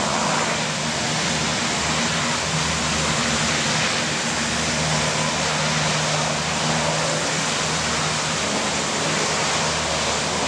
Hovercraft Loop
Hovercraft vehicle engine sound